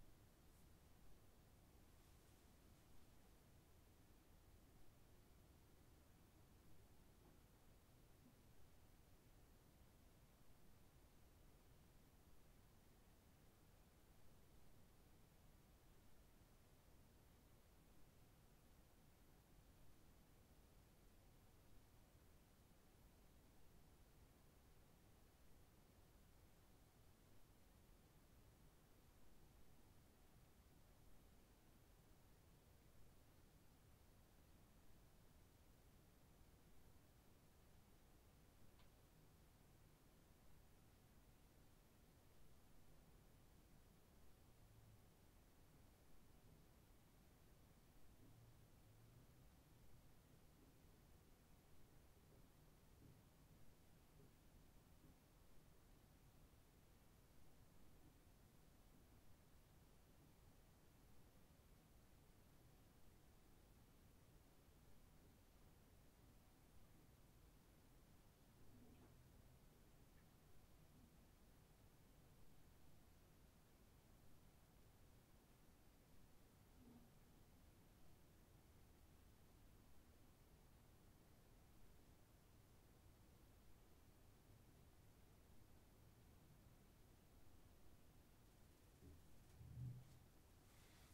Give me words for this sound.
wood silence moresilent
Room tone for the Footsteps wood sound pack.
room-tone, roomtone